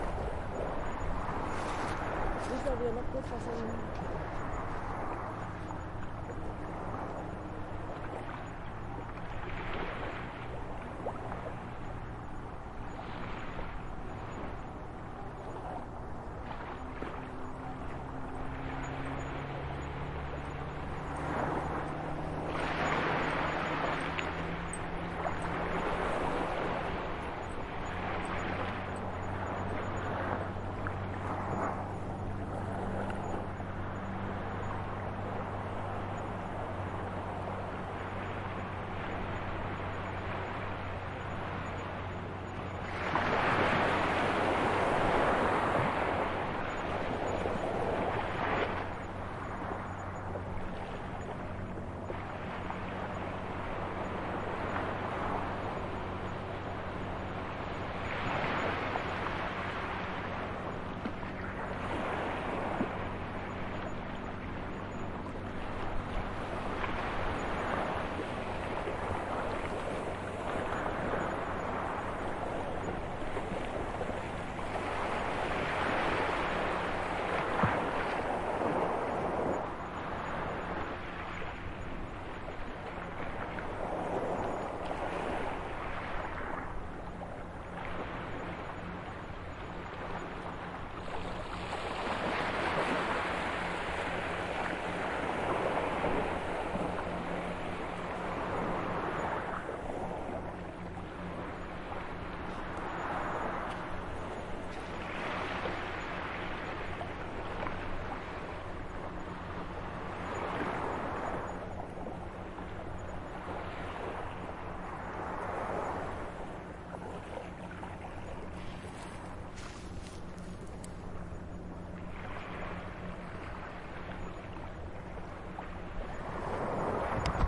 Light sea with rocks and motorboats in the background.
beach, coast, gulls, motorboats, ocean, sea, seagulls, waves